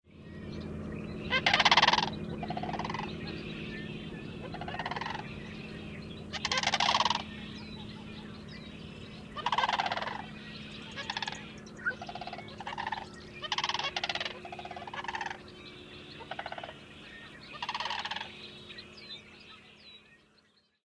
Sandhill Cranes Sherman Island 1003
grus-canadensis, sandhill-crane